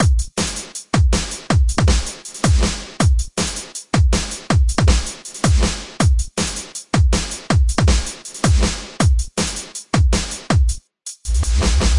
Drum n Bass loop (Drum + Perc)
This loop was made from layering many different Kick drums and Snares together.
Bass, Jungle, up, DnB, Perc, Jump, Kick, Breakbeat, Percussion, n, DB, Loop, Drum, Liquid, Breaks